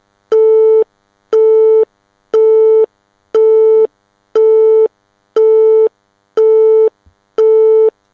The sound of suspending phone!